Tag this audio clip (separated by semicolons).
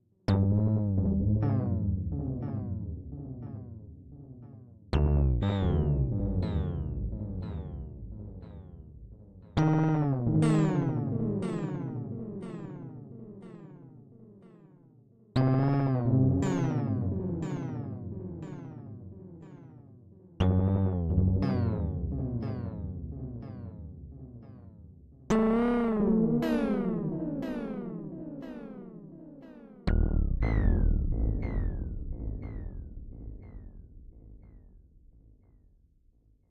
boing; bounce; broken; delay; droid; error; fail; robot; sound; weird; wobble; wrong